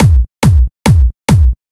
Kick Loop 140 BPM (Hands Up)
Dance, FX, HandsUp, Loop, Percussion, Techno